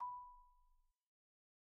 Sample Information:
Instrument: Marimba
Technique: Hit (Standard Mallets)
Dynamic: mf
Note: B5 (MIDI Note 83)
RR Nr.: 1
Mic Pos.: Main/Mids
Sampled hit of a marimba in a concert hall, using a stereo pair of Rode NT1-A's used as mid mics.